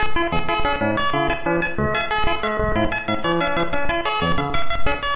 A sample of some kind (cannot recall) run through the DFX scrubby and DFX buffer override plugins
plugin, dfx, glitch, melody